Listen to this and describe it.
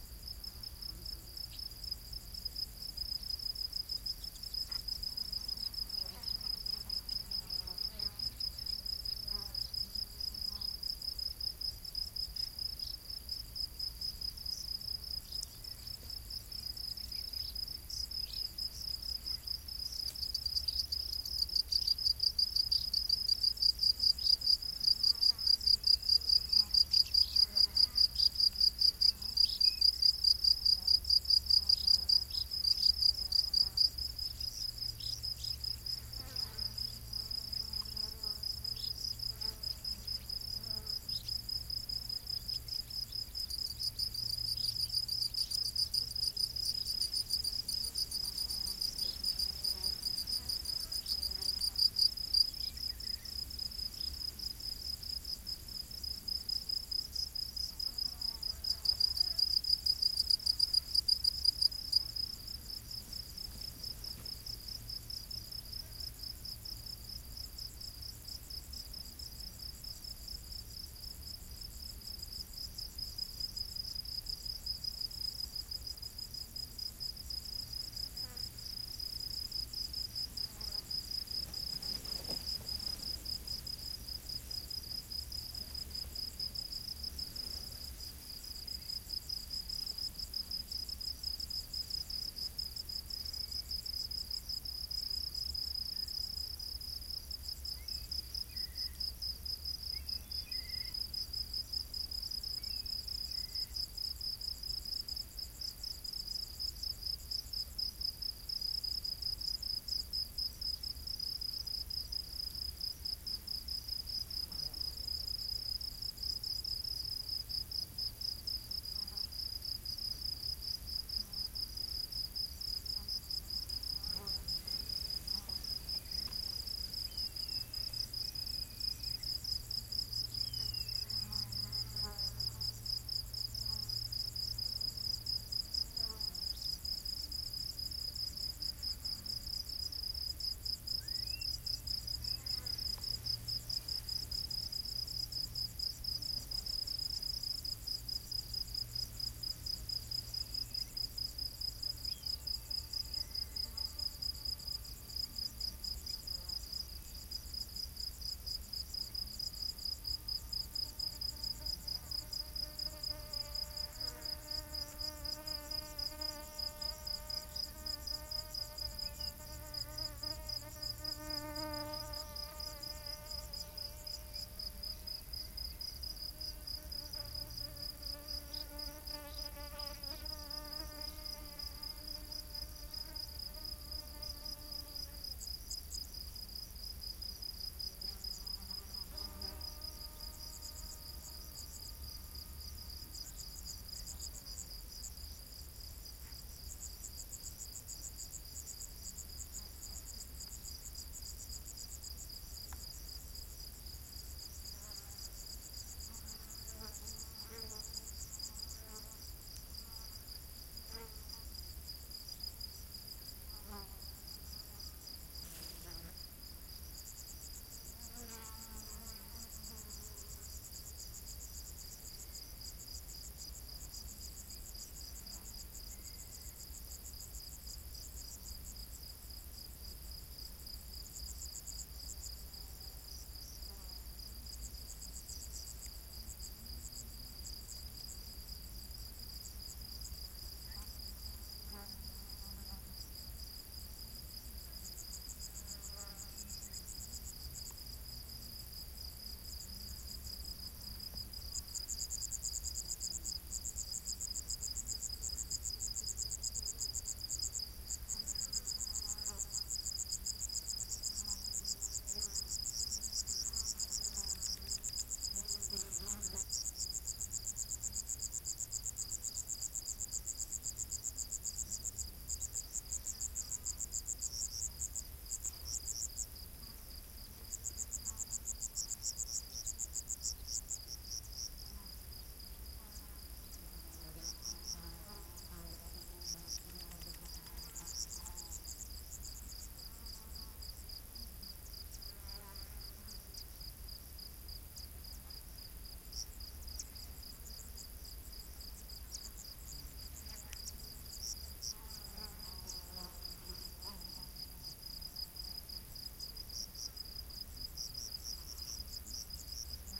20080702.marsh.summer
General marsh ambiance during summer. Noon, very hot (40C) and calm day: crickets, buzzing flies, a few bird calls (Kite, Swallow), fluttering gigantic grasshoppers. Must raise levels to get all details. Recorded with a pair of Shure WL183 mics, FEL preamp and Edirol R09 recorder. Hat, sun protection cream and a healthy blood system are prerequisites.
cricket, field-recording, insects, marshes, nature, summer